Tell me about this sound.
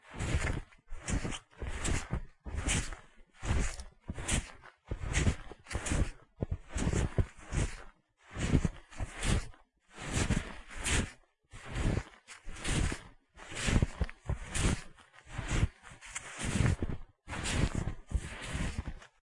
Walk Snow
A 'walking through snow' foley element.
walk, snow, crunch, foley